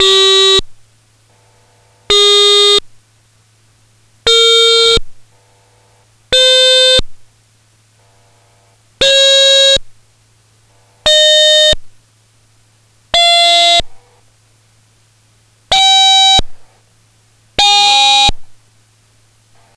Monotron-Duo sounds recorded dry, directly into my laptop soundcard. No effects.
beep, bleep, electronic, korg, monotron-duo, tone